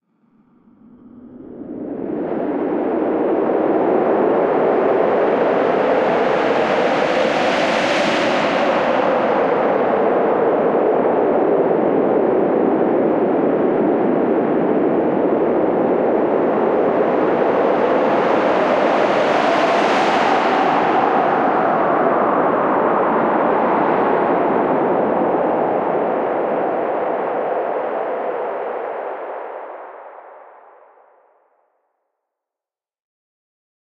Wind sounds created from a synthesizer on Logic Pro 9.
Blizzard, Storm, Weather, Wind, Windy